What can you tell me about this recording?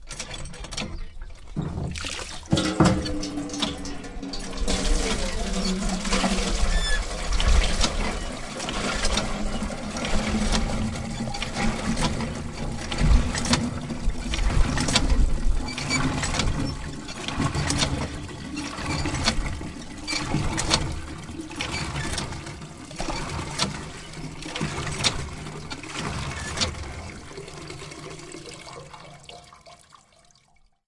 water pump manual old
An old squeaky manual water pump in my village at one of two cemetries. my gradnfather and my great-grandmother are lying here.
i like this place. Its very calm and peaceful. I combine some good childhood memories with this place.